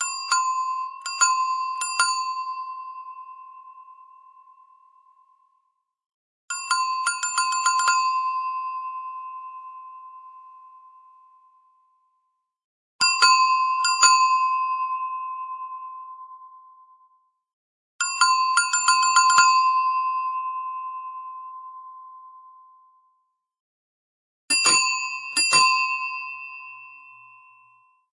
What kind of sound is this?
5 samples of a Bicycle Bell.
Recorder: Zoom H4n
Microphone: Sennheiser MKE-600
bicicleta, bicycle, ding, ring, timbre